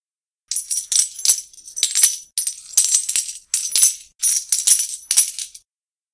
Counting Me Shillings
Dropping coins from hand to hand. Noise reduction, pitch bend, and 38% speed reduction used. Recorded on Conexant Smart Audio with AT2020 mic, processed on Audacity.
clinking, coin, coins, copper, counting, gold, greedy, hoarder, loot, metal, money, pay, pirate, shillings, silver, stingy, treasure